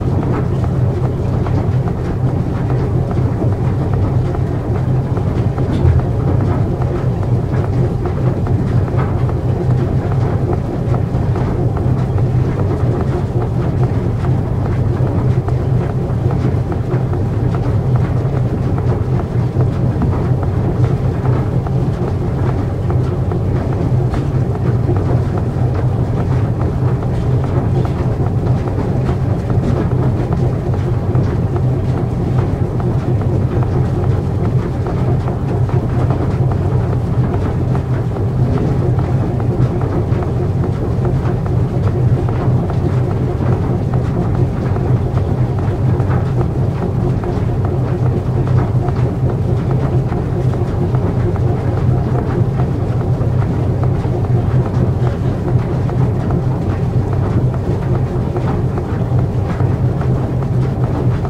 SE MACHINES MILL's mechanism 07
One of the machines in watermill.
rec equipment - MKH 416, Tascam DR-680